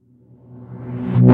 Reverse Laser
Sound created from electric guitar sample. Editrd in Acid Pro 7.
aliens, laser, space